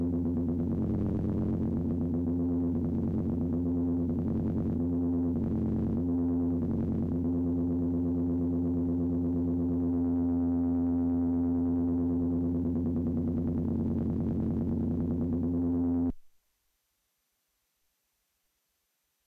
A series of sounds made using my wonderful Korg Monotron. These samples remind me of different science fiction sounds and sounds similar to the genre. I hope you like.
Machine, Electronic, Korg, Space-Machine, Monotron, Futuristic, Sci-Fi, Space